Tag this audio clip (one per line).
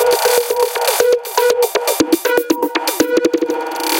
120BPM
dance
drumloop
electro
electronic
granular
loop
rhythmic